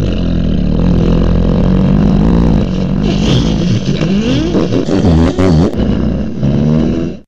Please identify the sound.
long painful fart
Just a long, reverberating and multi-faceted fart sound made with my ample arm, sock over the microphone, in a small sound booth. No extra noises. Editted a few together from different tracks to create the 'overlapping' effects of the smaller ones, added a few effects, and was used in a 'toon of mine (kind of 'butthole of the world' volcano going off, suffice to say). Not as wet sounding as I thought it was going to be, though. Can be cropped, etc.
fart, long, deep, painful